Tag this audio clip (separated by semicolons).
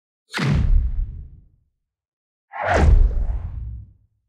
Buff; Game; level; lvl; Positive; Power; up; Video